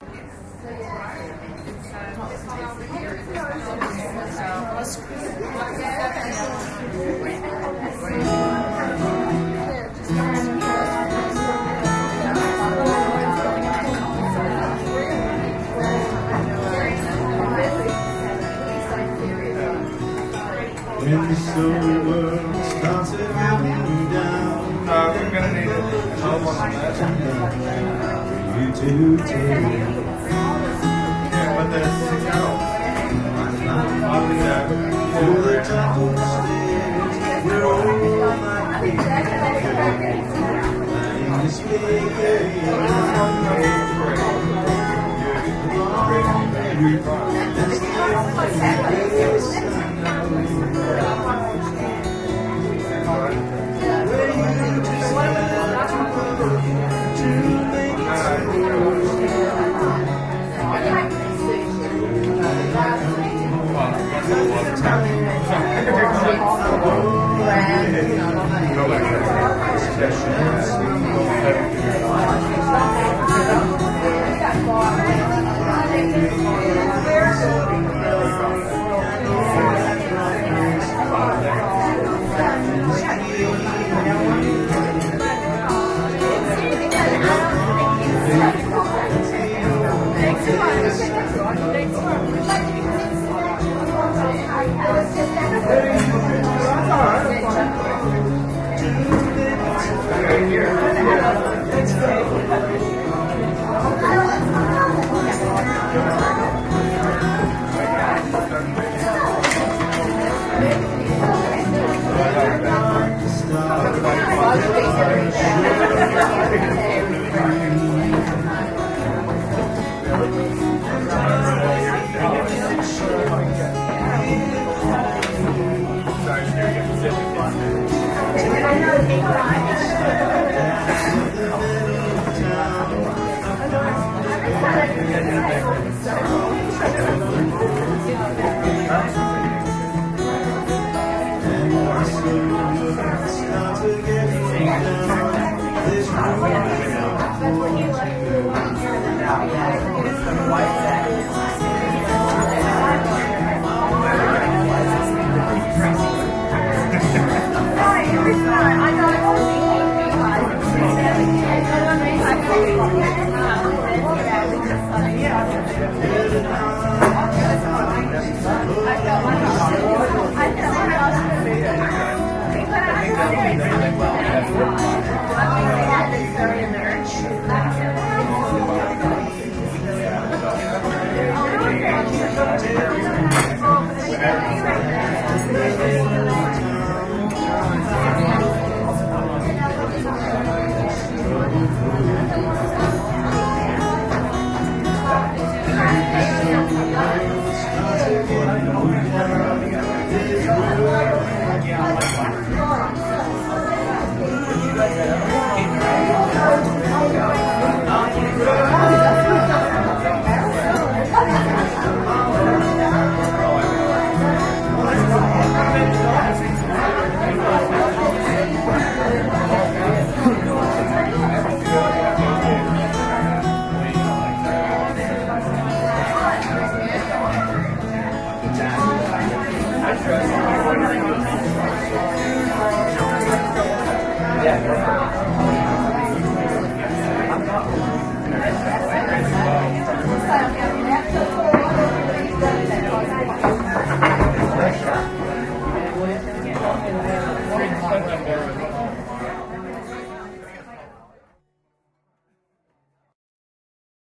Palm Cove - Apres Singer
Imagine a balmy tropical night, on the beach, and a lonely singer is ignored while we all eat our food. Recording chain: Edirol R09HR (internal mics).